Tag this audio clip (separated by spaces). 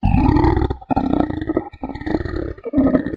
any thing Fits dragons dinosaurs